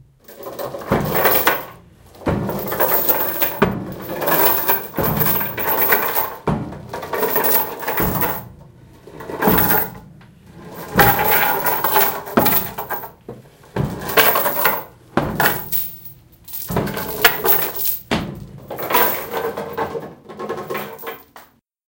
Step Bass Chain 2
For 2021 version of Christmas Carol I recorded my own version of the Marley entrance. The steps are enhanced with a piano note and the chain effect is from an actual tow chain.
carol, christmas, entrance, ghost, marley, steps